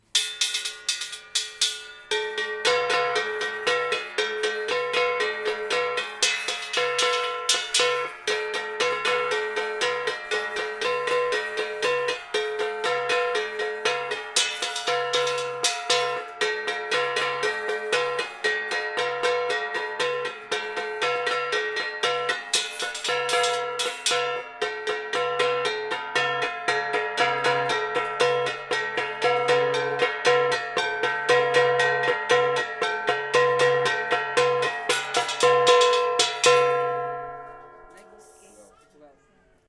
LS 32778 2 1 PH EthnicMusic
Traditional music performed by Igorot people.
I recorded this audio file in March 2015, in Tam-awan Village (Baguio, Philippines), while people from Igorot tribe were playing traditional music.(Close miking)
Thanks to all of them for their kind cooperation.
Recorder : Olympus LS-3 (internal microphone, TRESMIC off).
Field-recording, Baguio, ethnic, tribe, Igorot, instruments, native, percussion, tribal, Philippines, drums, Tam-awan, traditional, drum, gong, gongs, Ifugao, music